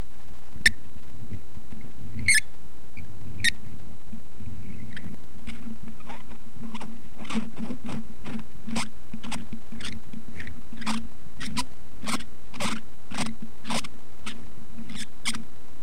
A squeaking noise made by unscrewing a cap off of a microphone
annoying, rrt, screwing, sound, squeak, squeaking, squeek, twisting